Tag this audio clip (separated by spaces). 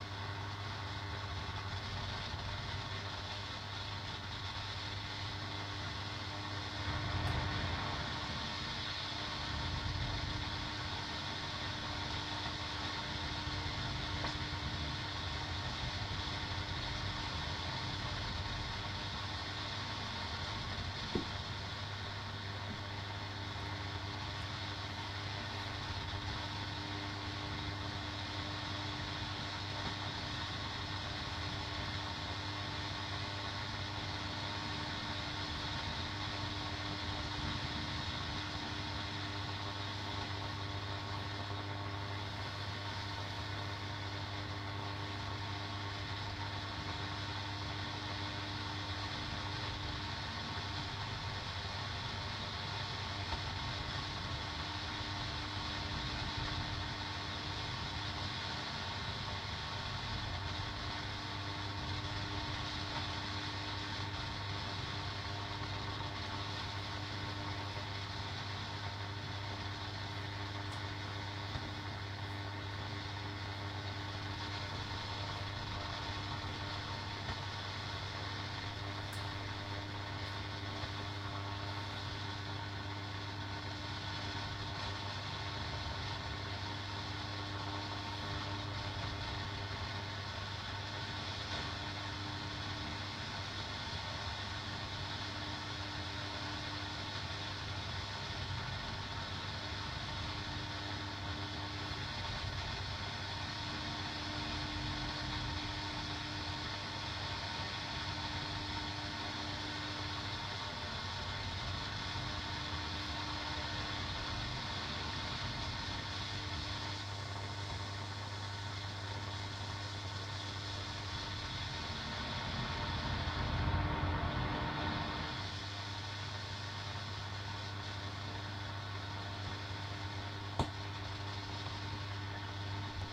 dead faint